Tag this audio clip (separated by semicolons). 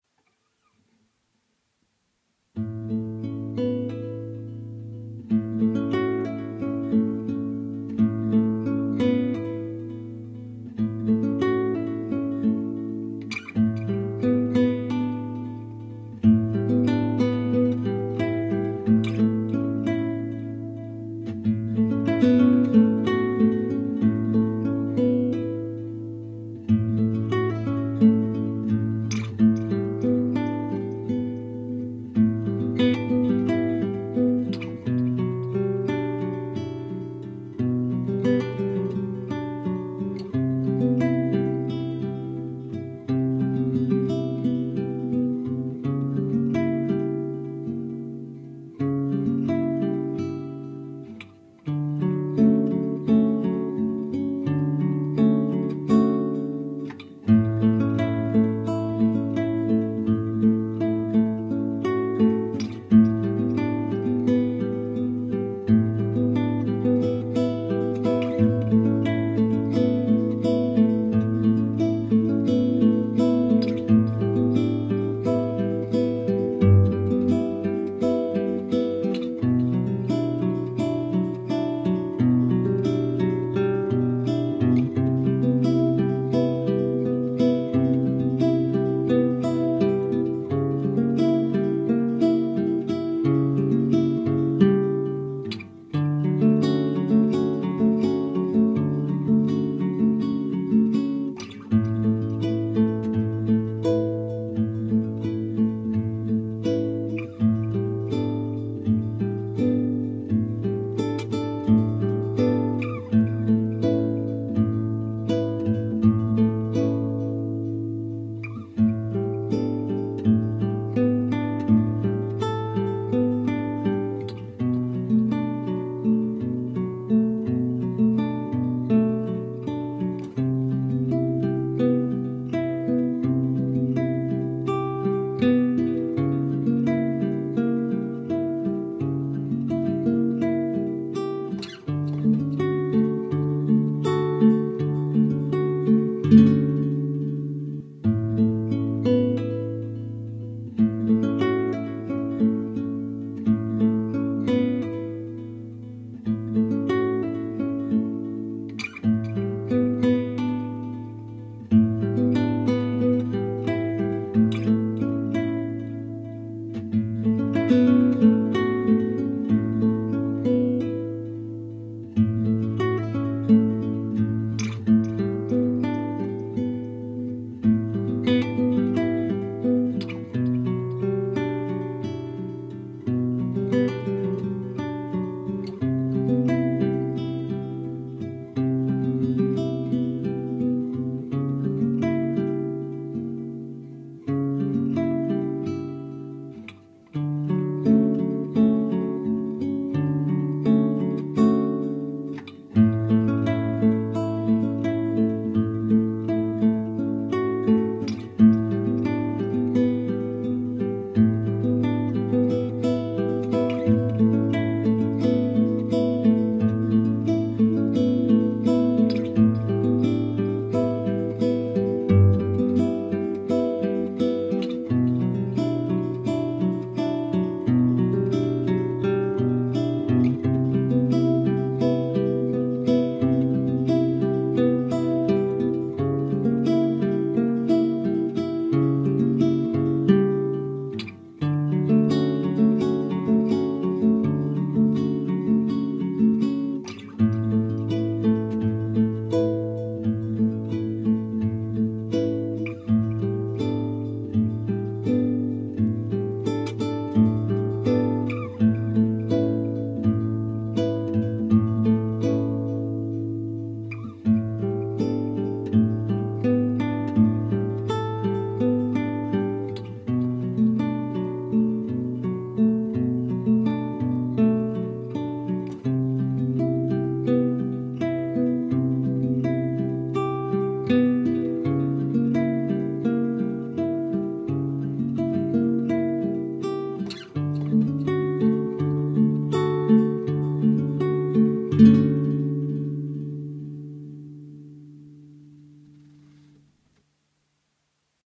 suave; clima; guitar; ambiente; guitarra; atmosfera; melodia; ambient; slow; melody